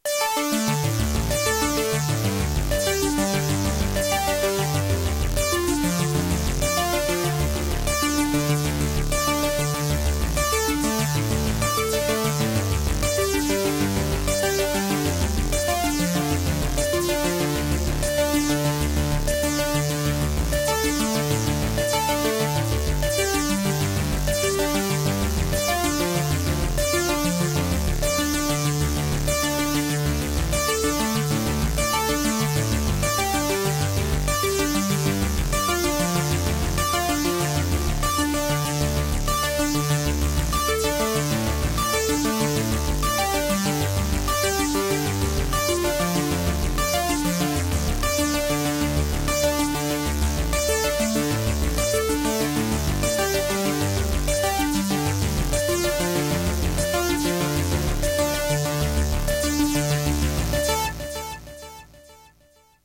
Old Computer Music #55
synth with arpeggio notes. electronic music loop.
A32
arpeggio, electric, electronic, loop, music, retro, sound, synth, vintage